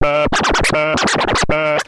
I thought the mouse "touchpad" of the laptop would be better for scratching using analog x's scratch program and I was correct. I meticulously cut the session into highly loopable and mostly unprocessed sections suitable for spreading across the keyboard in a sampler. Some have some delay effects and all were edited in cooledit 96.
dj, hip-hop, loop, rap, scratch, turntable, vinyl